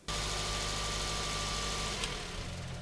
carr off
Car switched off
off, switch, turn